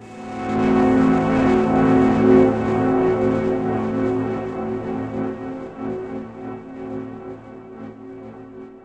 An acoustic guitar chord recorded through a set of guitar plugins for extra FUN!
This one is Am6.
ambient, electric